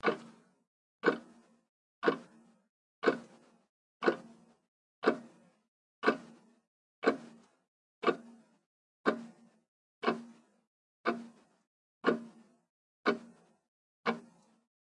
Zermonth's clock tick processed - another remix.